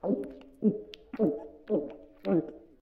drink long
The sound of the video game hero drinking a potion. Basically recorded a "dry drinking act" and edited it to reduce noise. This sound was recorded with a Sony PCM M-10 and edited for the Global Game Jam 2015.
drink, effect, game, potion, sfx, video-game